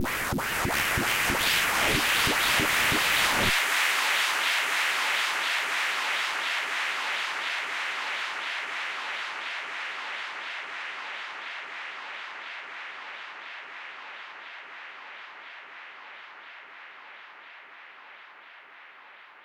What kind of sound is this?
Rise ( woosh )
Not realy a woosh effect but i called it. It´s more like a riser. With Higpassfilter nice playable to get a White noise effect ... Created in Music Studio using some internal effects